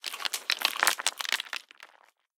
Sound of small rocks hitting the ground. This is a mono one-shot.